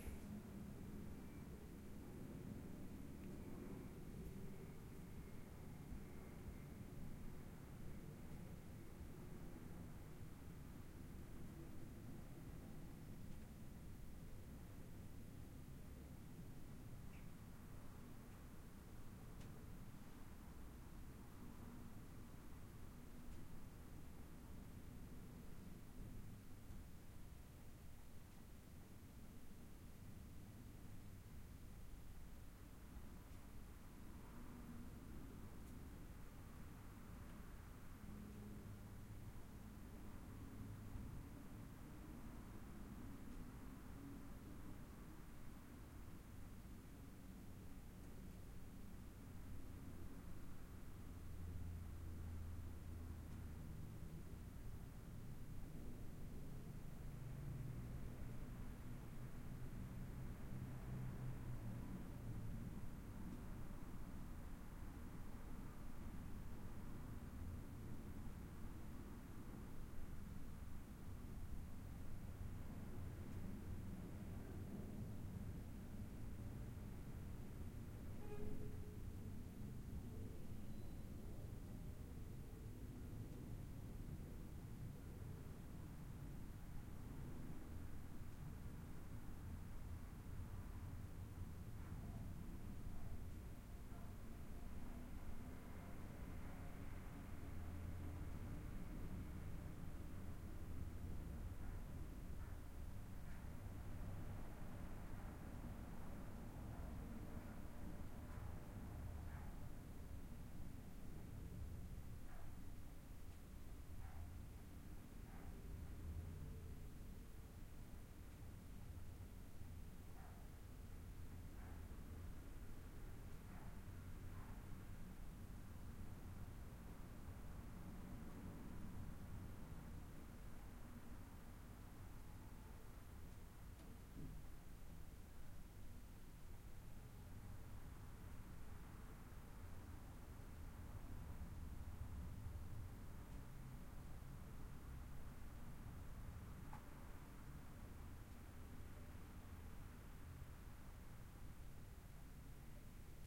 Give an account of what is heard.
Roomtone Bedroom
Roomtone quiet bedroom
Atmos, Roomtone, Quiet